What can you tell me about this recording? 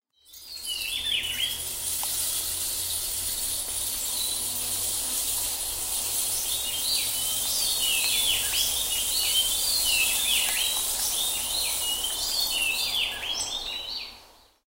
cool tropical rainforest sounds. Quick Mark #8